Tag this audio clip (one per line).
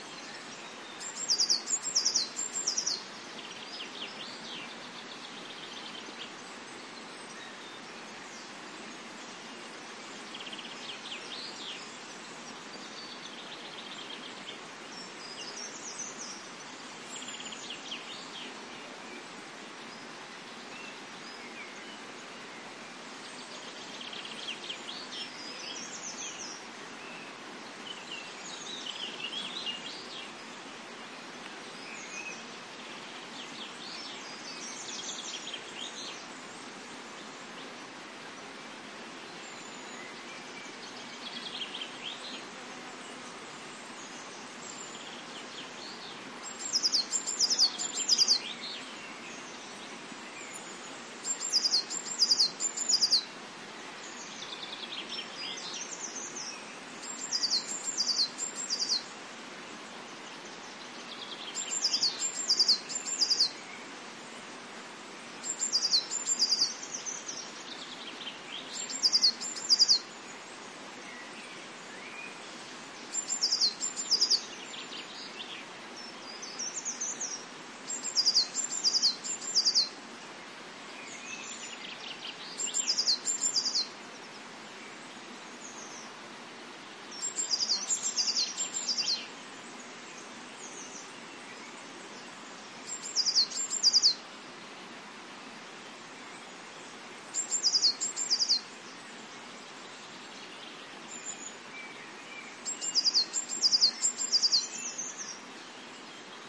ambiance
birds
field-recording
gallery-forest
mediterranean
river
Spain
spring